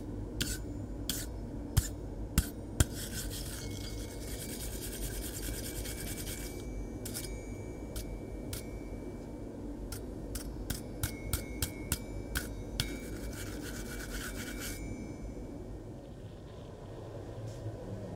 Using an air-powered metal brush on a metal piece.
air, brush, de, industrial, metal, ntg3, power, powered, R, r26, Roland, tools